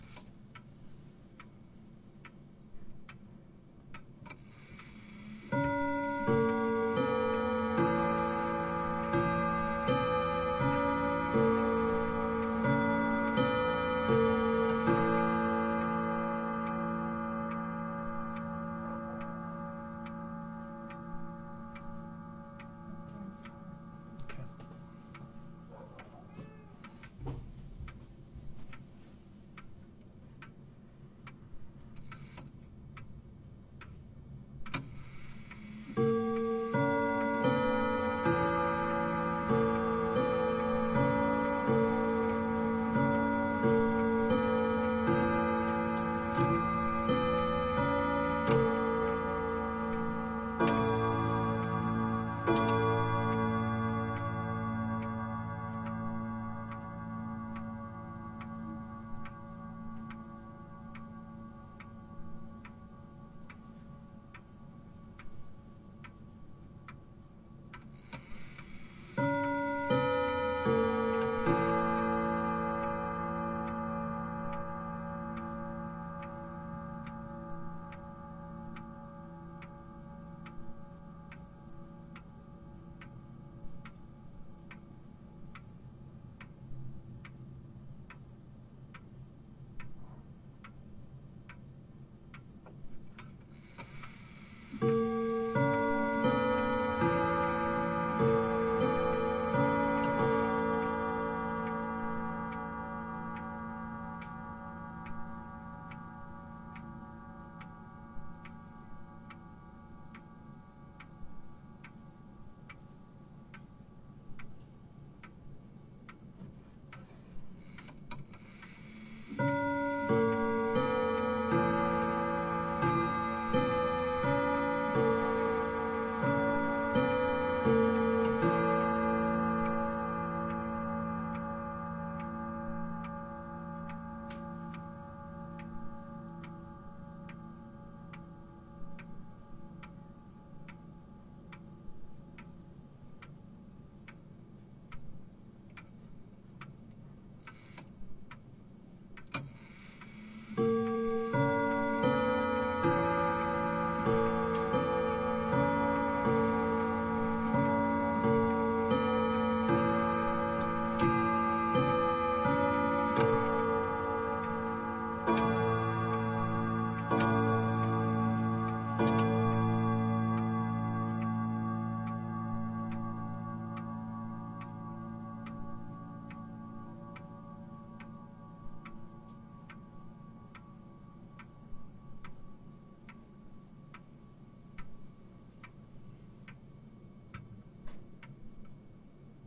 Grandfather Clock - Westminster chimes - recorded with Zoom H4